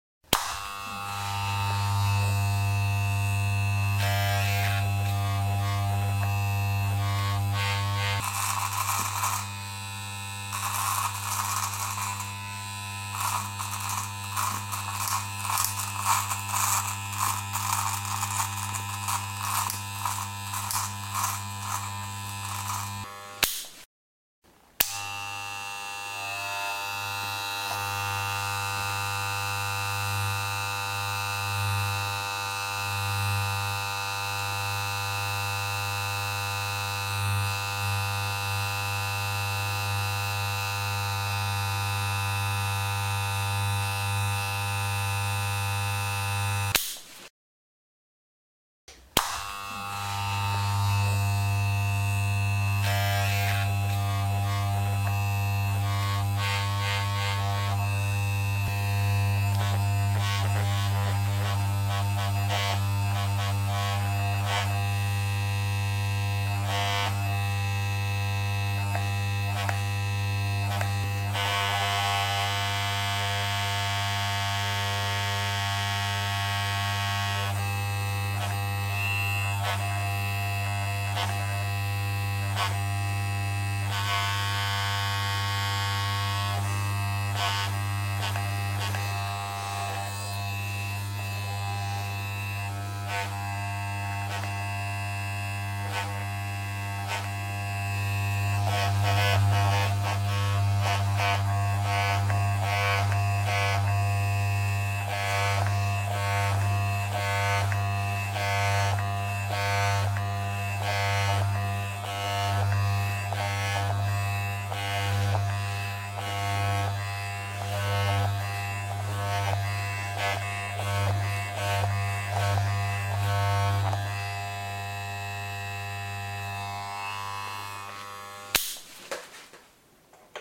Hair Clippers / Hair-cut with Clippers. In hand and in use.